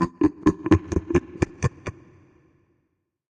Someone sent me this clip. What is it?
A deep and menacing evil male laugh.